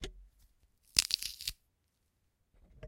A bone crack simulation using celery.
Crack, Neck, Bone